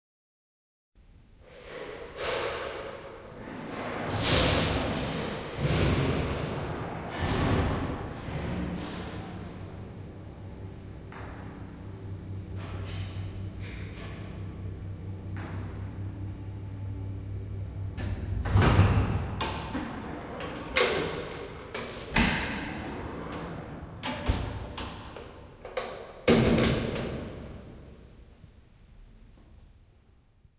old elevator 1
elevator ride, rattling doors, echoing hallway
ambience, echo